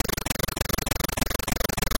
Percussive rhythm elements created with image synth and graphic patterns.
element, image, loop, soundscape, synth